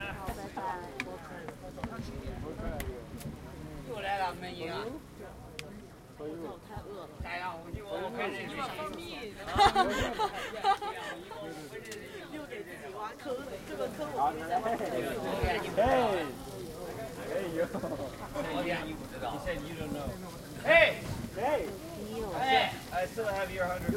In the doorway of "The Shelter" ,It is Shanghai a nice club.
Doorway, Shanghai, Shelter